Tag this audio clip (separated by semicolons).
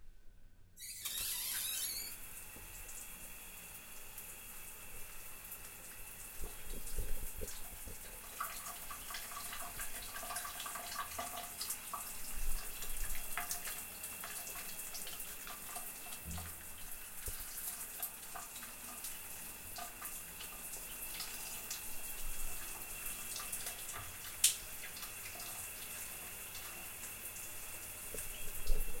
water; shower; drip